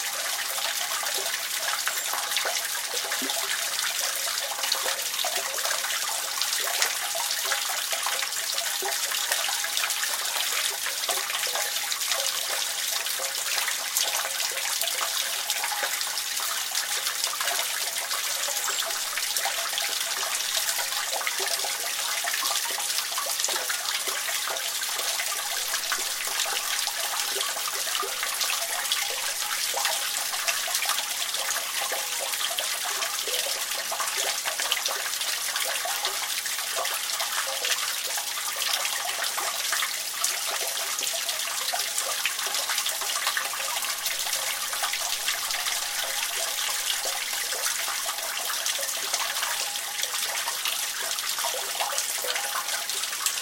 the water tap is open and the water flows into a water well.
Recording machine Zoom F4
Microphone 1 Line-audio OM1
software Wavelab
plug-in Steinberg StudioEQ
Liquid, Water, water-stream, water-tap